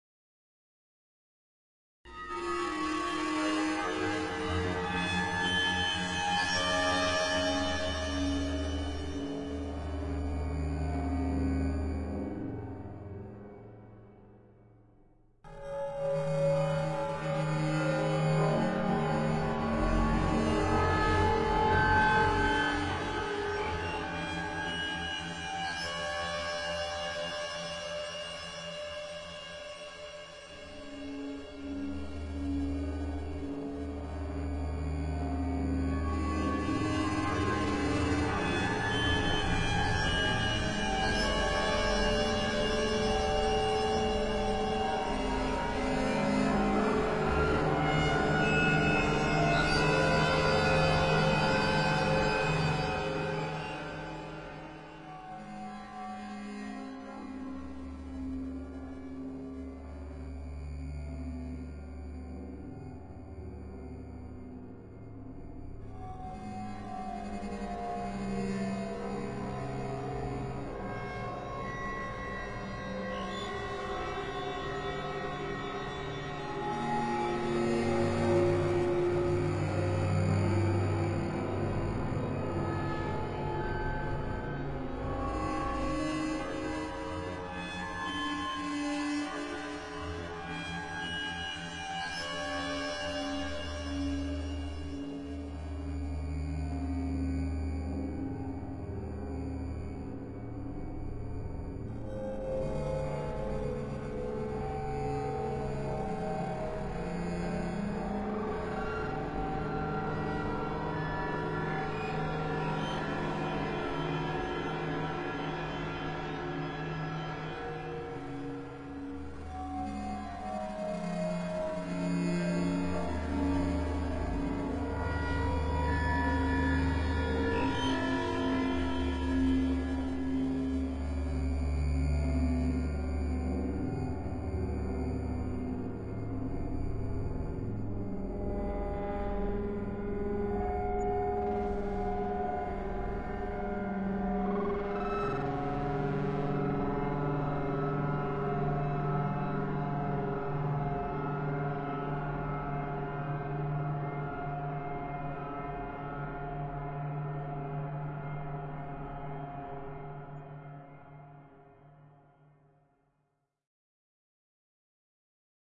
Dark Water
A metallic, resonant tone produced by processing and stretching various acoustic sounds.
abstract, electronic, metallic, processed, resonant, time-stretched